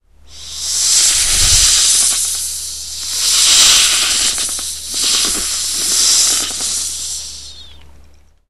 snake-like sound done by whistling close to a micro.
beatbox, snake, whistle